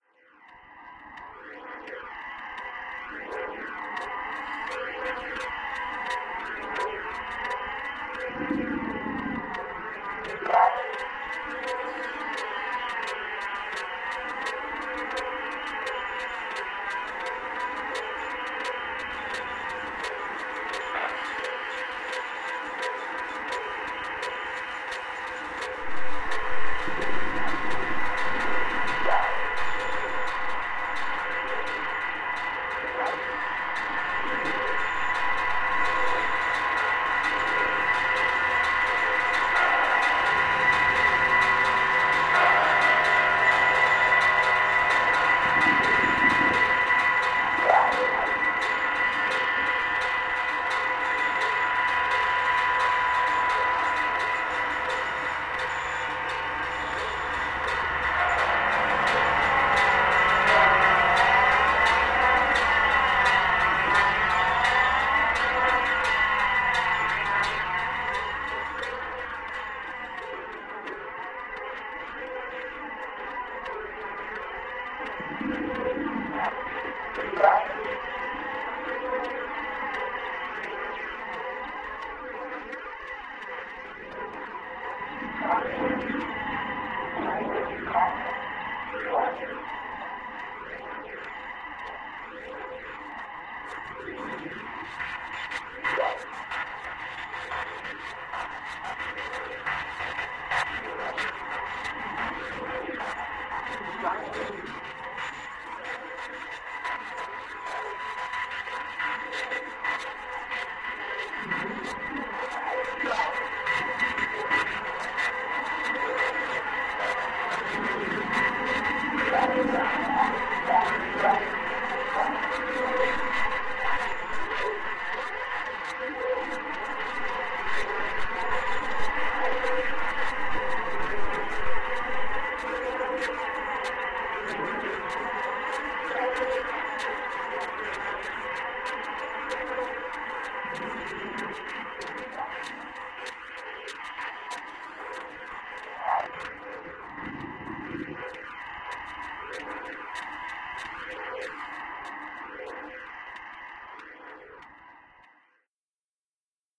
ContinuumGeneration3 Flying
telephone-game, triplet-rhythms, remix, continuum-1, processed-sound, resonance, swirling